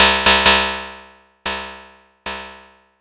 Rhythmic plucking. Good for sample synth music.